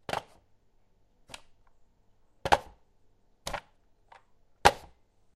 Picking up a plastic card shuffler and putting it down on a hard wooden surface a few times
pick-up, wood, impact, item, put-down, pastic, table